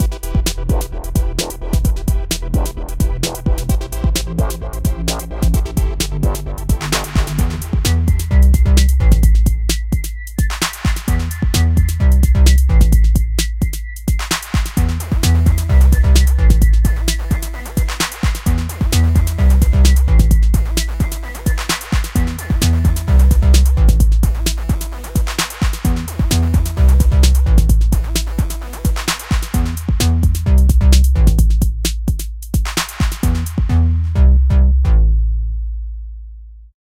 130 IntroOutroSeq clubland
130, 130-bpm, 130bpm, bpm, breakbeat, club, credits, dance, electronic, intro, mix, music, outro, sequence, track, trance